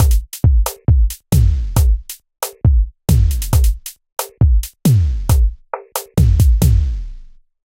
Slow zouk drum beat loop